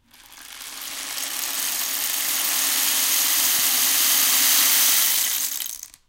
RAIN STICK B 007
This sample pack contains samples of two different rain sticks being played in the usual manner as well as a few short incidental samples. The rain stick is considered to have been invented in Peru or Chile as a talisman to encourage rainfall however its use as an instrument is now widespread on the African continent as well. These two rainsticks were recorded by taping a Josephson C42 microphone to each end of the instrument's body. At the same time a Josephson C617 omni was placed about a foot away to fill out the center image, the idea being to create a very wide and close stereo image which is still fully mono-compatible. All preamps were NPNG with no additional processing. All sources were recorded into Pro Tools via Frontier Design Group converters and final edits were performed in Cool Edit Pro. NB: In some of the quieter samples the gain has been raised and a faulty fluorescent light is audible in the background.
weather, rattle, ghana, chilean, ghanaian, stick, storm, shaker, instrument, chile, peruvian, percussion, rain, rainstick, peru